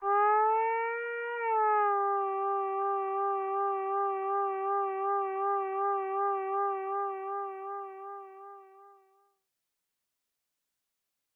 Cry-synth-dry
falling-synth, Synth